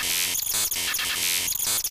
rhythmic-loop-1
A circuit bent electric tweak sound ripped from a recording session of a circuit bent laser gun for kids.
1/3 circuit bent loopable sounds from my circuit bent sample pack II.
electricity, bent, electronic, laser, bender, circuit, tweaked, loop, glitch, bending